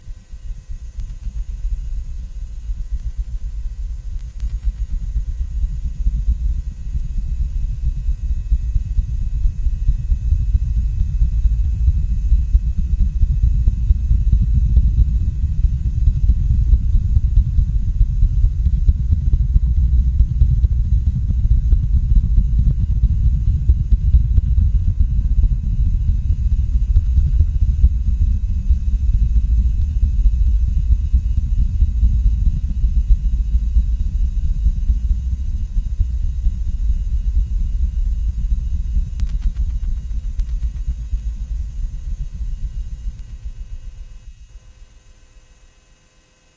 You hear a thundering that comes closer. It's dark, sun has not got up yet. The sound is like thunder but yet isn't. You saw nothing in the sky.